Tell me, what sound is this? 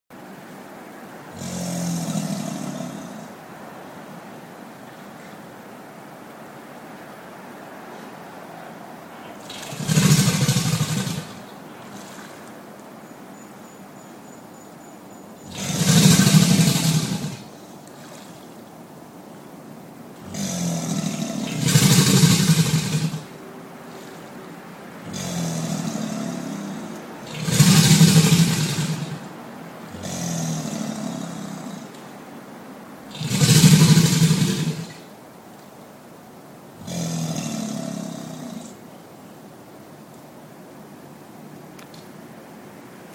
This is a female and male alligator mating pair. The "snoring" bellow that is quieter and comes first is the female. The "loud belching" bellow that is accompanied by water-shaking upward from the alligator's slightly-submerged back is the male. There are several of each, they take turns, although the male gets in the last "word." This pair lives in SW Florida at the Calusa Nature Center. Credit: Calusa Nature Center Planetarium
bellowing-alligators, ambient